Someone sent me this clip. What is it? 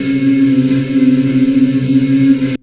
Multisamples made from the spooky living dead grain sound. Pitch indicated in filer name may be wrong... cool edit was giving wacky readings... estimated as best I could, some are snipped perfect for looping some are not.